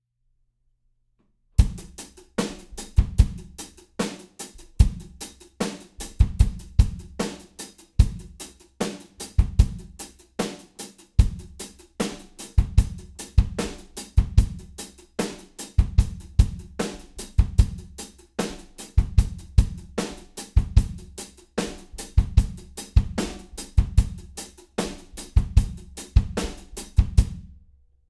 A bunch of hip-hop drum loops mixed with compression and EQ. Good for Hip-Hop.